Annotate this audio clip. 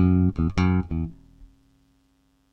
Two tone on a bass and a third ghosted.